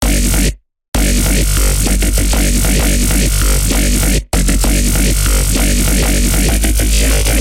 becop bass 16.
Part of my becope track, small parts, unused parts, edited and unedited parts.
A bassline made in fl studio and serum.
A bouncy 1/8th over 1/3rd bassline with a talking grindy bass
Djzin, dubstep, electronic, loop, wobble, Xin